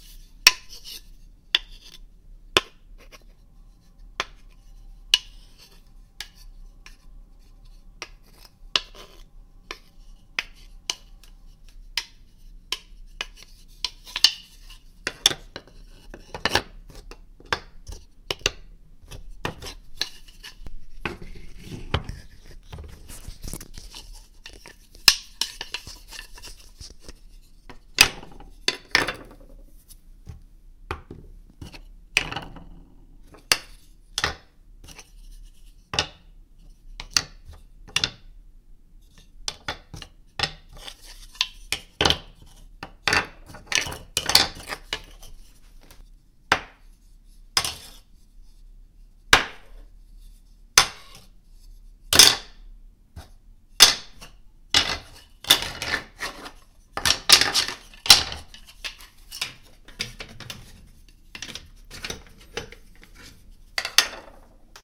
Hitting two wooden spoons (Ok actually a spoon and a spatula) together.
wood, clack, kitchen, clacking, spoon, wooden, spoons, hit